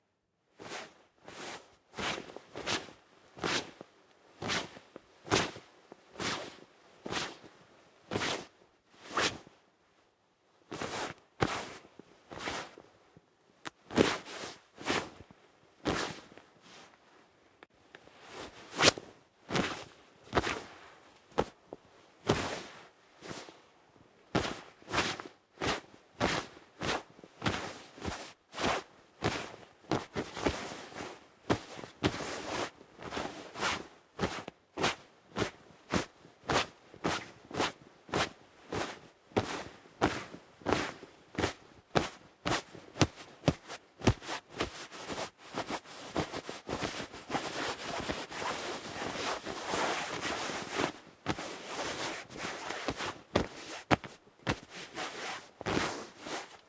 fabric movement fast (polyester)
fabric/clothes movement (Foley)- fast gestures wearing a polyester raincoat.
M179-> ULN-2.
polyester, clothes, fast, raincoat, movement, violent, Foley, fabric